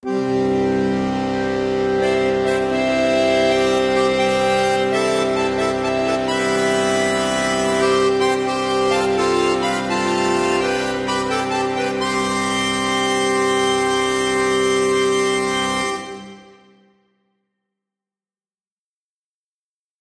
Pirate's Bounty
Just a little musical jingle for a good treasure hunt!
bounty, cartoon, film, find, found, free, funny, game, gold, loop, movie, music, pirate, soundesign, soundtrack, theme